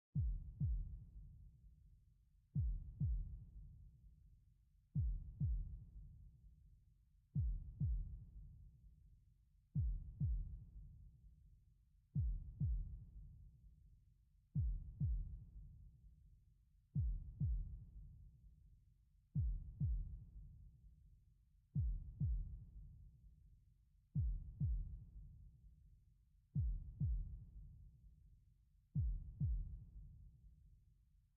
Distant heartbeat
Distant sounding heartbeat.
Beat, Distant, Drama, dream, dreamlike, echo, Heart, Intense, reverb